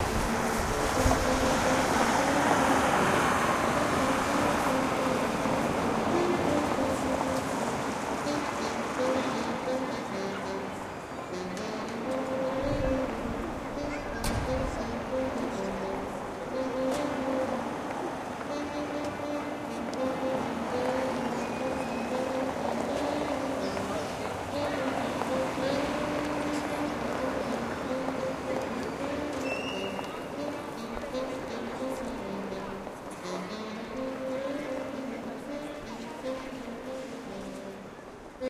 Streets of Riga, Latvia. Music on Dome square
street sounds in Riga